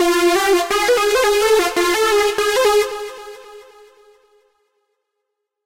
Riff 7 170BPM
short synth riff loop for use in hardcore dance music styles such as happy hardcore and uk hardcore